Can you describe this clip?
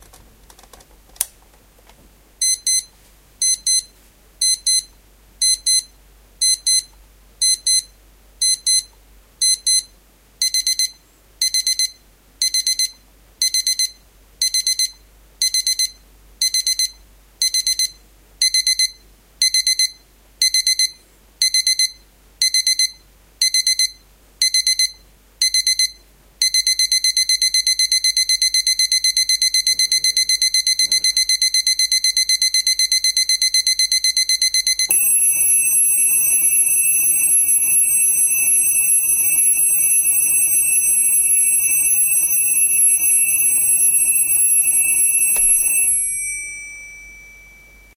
CasioHC-DM-550
DM-550, alarm, clock, compresion, high, olympus, recording, sample